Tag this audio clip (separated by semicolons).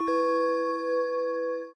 happy-new-ears; sonokids-omni